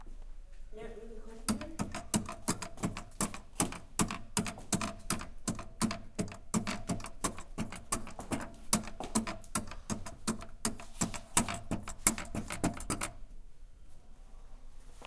Sound recording in and around the house of S.
domestic, home, house-recording, indoor